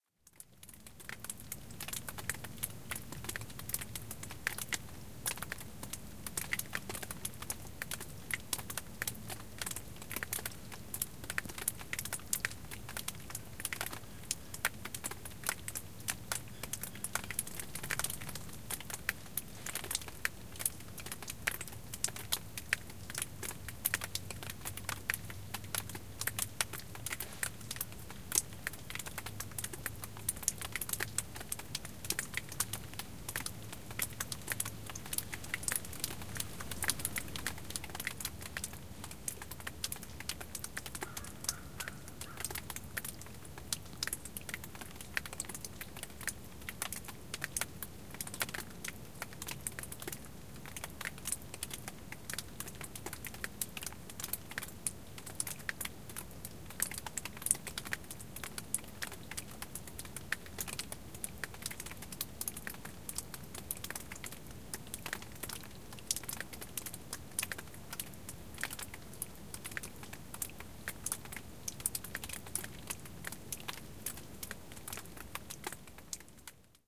During a hike in some rocky woods, I found a face of sandstone which had numerous hollows in it which contained icicles of various sizes. The temperature was just above freezing so these little icy blades were melting into the bare ground at the base of one of the rocky cliffs...
This is a recording of those little, clear drops of winter's melt-water hitting the rock, and, in some cases, hitting some moss-covered rocks. To add to the outdoor ambiance, there is a bird calling out at the 14 second to 17 second mark.
And a crow calls at 41 seconds into the recording.
This was made using the Zoom H4N, and it's internal built-in microphones.